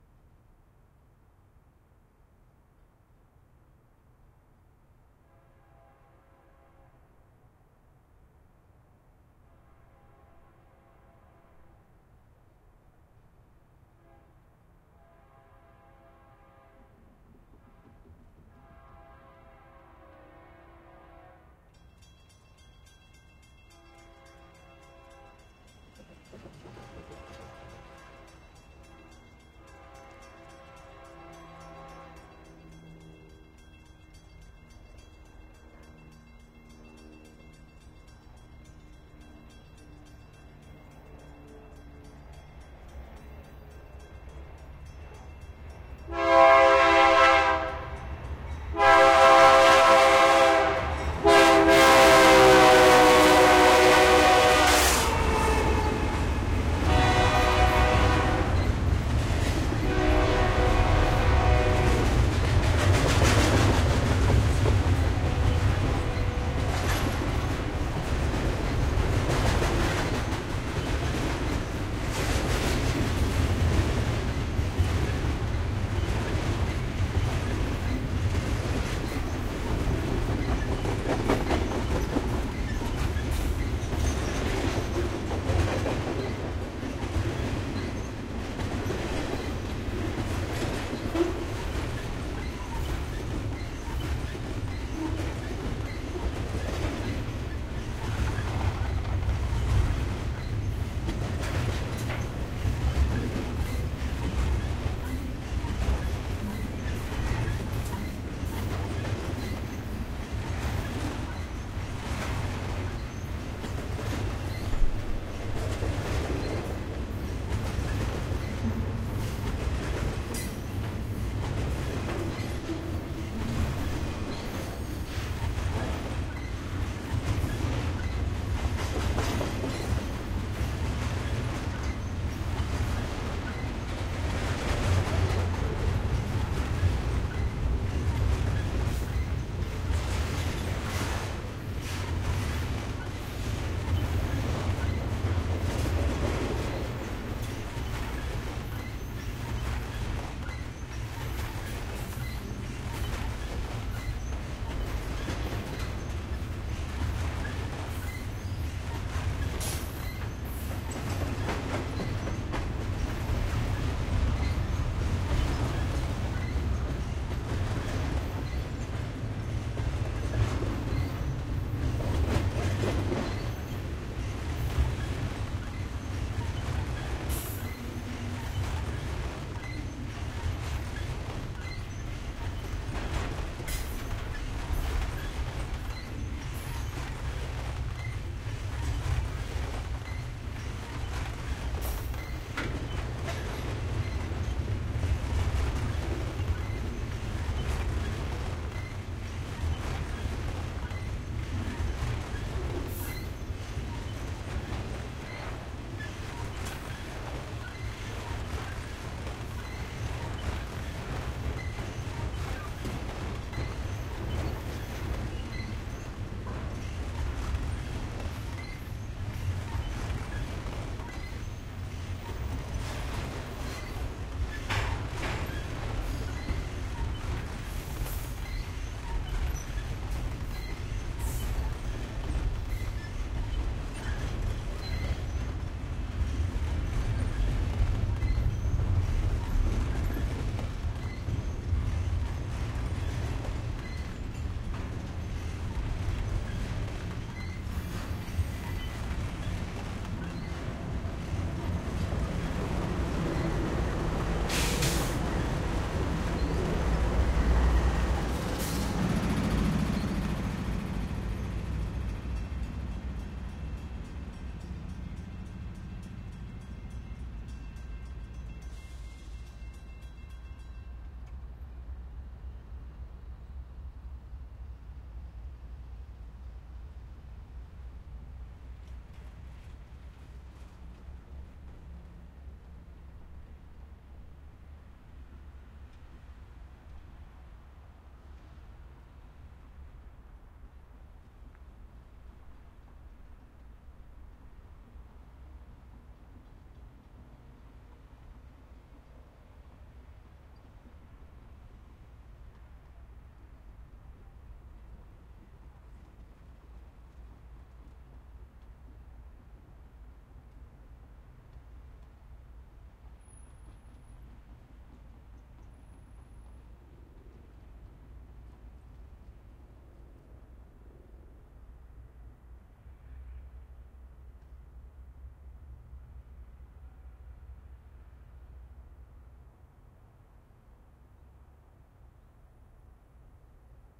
sample pack.
The three samples in this series were recorded simultaneously (from
approximately the same position) with three different standard stereo
microphone arrangements: mid-side (mixed into L-R), X-Y cardioid, and
with a Jecklin disk.
The 5'34" recordings capture a long freight train (with a helicopter
flying overhead) passing approximately 10 feet in front of the
microphones (from left to right) in Berkeley, California (USA) on
September 17, 2006.
This recording was made with a Rode NT4 X-Y stereo microphone (with
a Rycote "Windjammer") connected to a Marantz PMD-671 digital